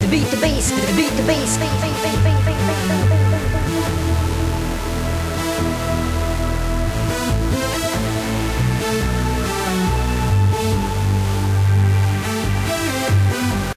Beat To Bass Clip
bass, beat, bigb, clip